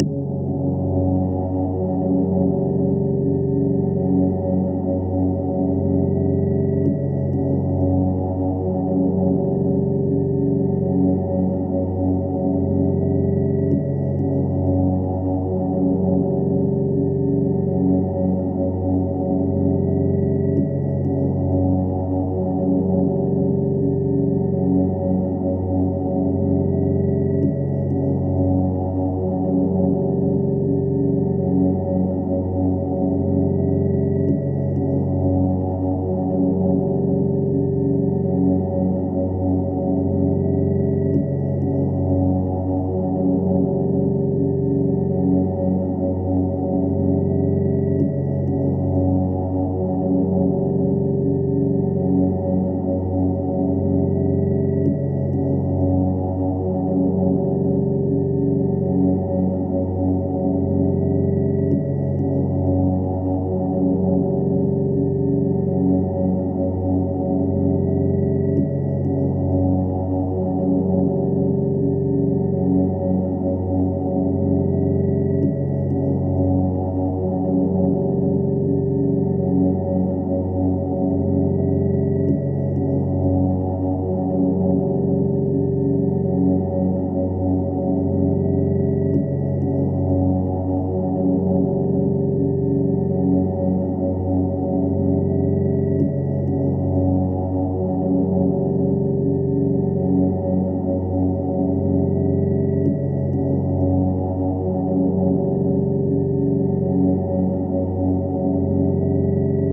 I think I've made this out of a HG Fortune synth but I can't remember which one and like usual, done in FL Studio with quite some EQing applied to it too.
Excuse the popping though, it's an artifact caused by the synth.
effect, atmosphere, processed, sci-fi, experimental, sound-design, soundscape, synth, space, deep, ambient, cinematic, dark, drone, pad